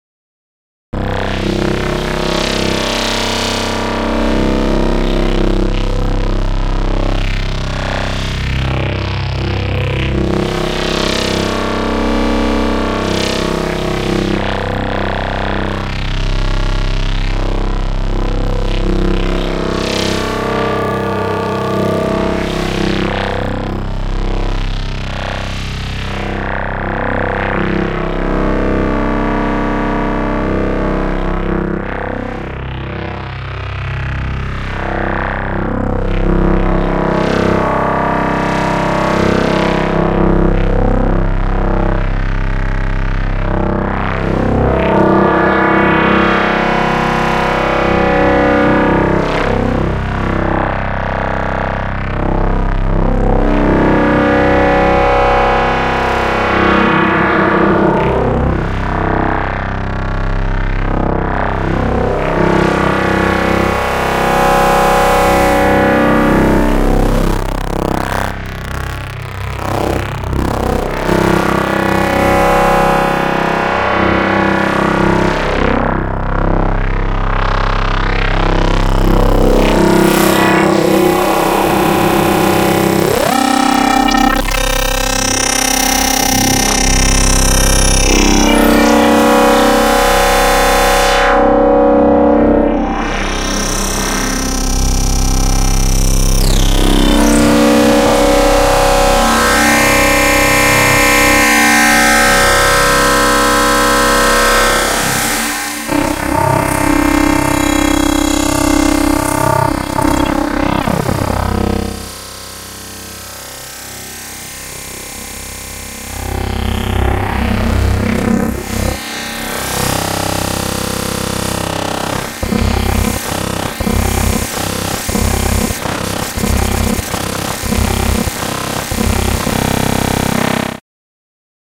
A biggle sound for urrz. Yr urrz. A biggle crunchy soul smeltin bass cronk for yr urrz.